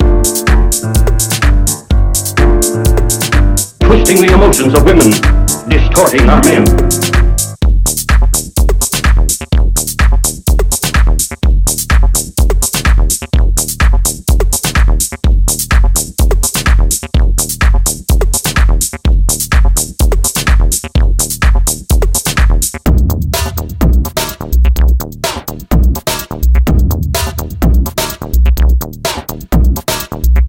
Twisting, Distorting 126bpm 16 Bar
Beat Mix with sample. Let's all do the Twist and Distort!